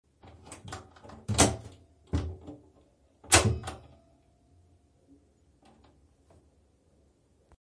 Lock - Unlock
locking and unlocking a door
key, door, keys, unlocking, lock, locking, unlock